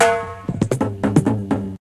Intro 05 136bpm
Roots onedrop Jungle Reggae Rasta
Jungle, onedrop, Rasta, Reggae, Roots